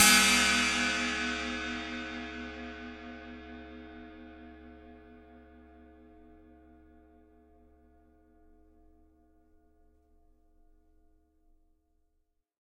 Crash Stick 01
Cymbal recorded with Rode NT 5 Mics in the Studio. Editing with REAPER.
special, bell, paiste, hit, zildjian, sound, metal, crash, meinl, one-shot, stick, beat, groove, cymbal, china, cymbals, percussion, sample, drum, drums, sabian